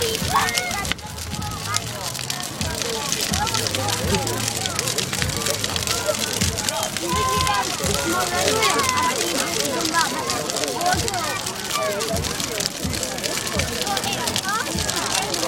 A big fire at a pub's bonfire/Halloween party. Rotherham South Yorkshire UK 31 Oct 2014.
crackle, burning, fire, bonfire